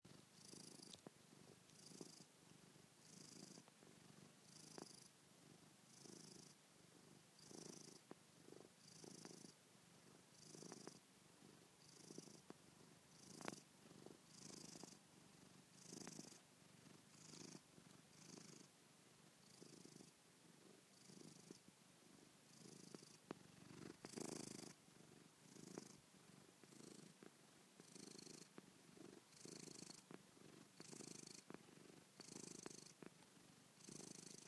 Cat Purring 2
a purring cat
animal, animals, cat, cats, domestic, feline, kitten, kitty, meow, pet, pets, purr, purring